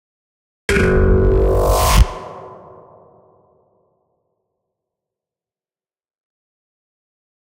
Lazer Pluck 9
Lazer sound synthesized using a short transient sample and filtered delay feedback, distortion, and a touch of reverb.
sci-fi, alien, Lazer, beam, Laser, synth, spaceship, zap, monster, buzz, synthesizer